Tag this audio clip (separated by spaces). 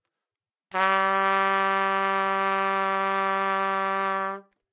good-sounds G3 trumpet single-note neumann-U87 multisample